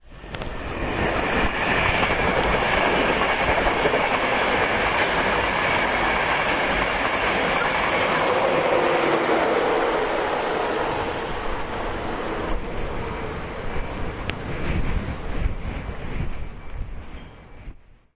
Class 91 DVT Lead through Finsbury Park
Set of Mark 4 coaches lead by DVT pushed by a Class 91 passes express through Finsbury Park Station. Sound of departing station Class 313 at beginning of recording.
91, mainline